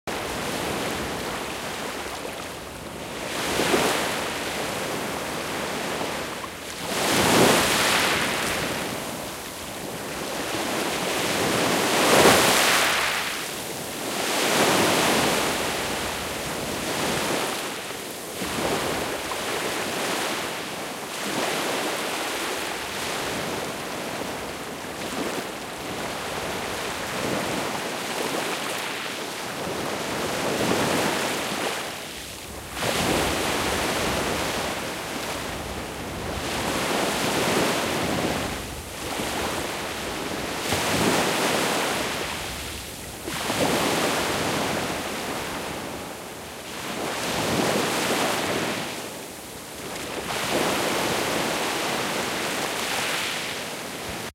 Ocean Waves 06
Ocean Waves by the Baltic Sea (Stubbenkammer).
Recorded with a Zoom H4n.
Thank you for using my sound!
baltic, beach, coast, field-recordng, meer, nordsee, ocean, oceanside, ostsee, ozean, sea, seaside, shore, splash, water, waves, wellen, wind